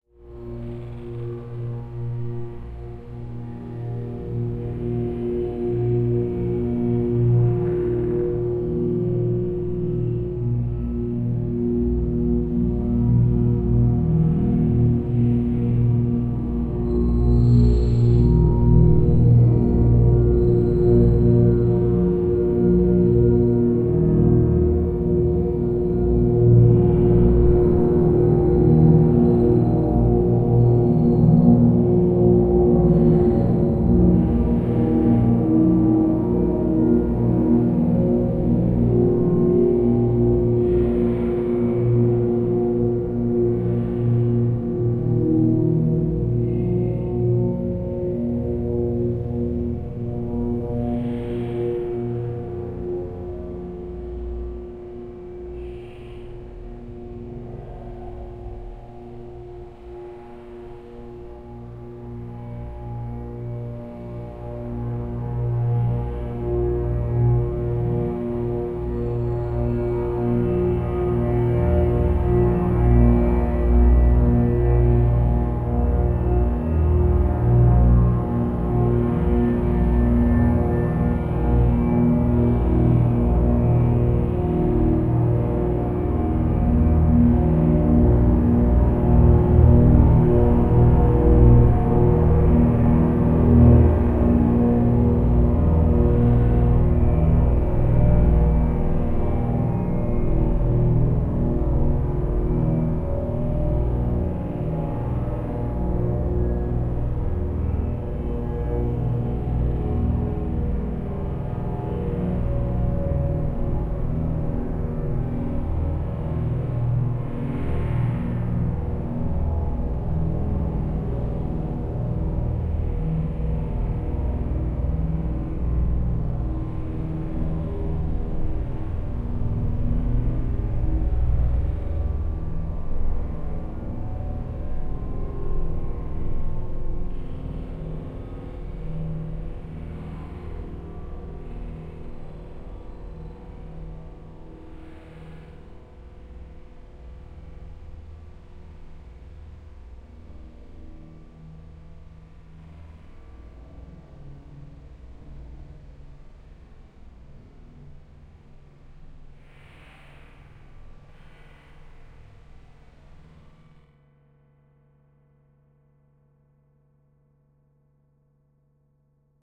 live orchestra with granular FX processor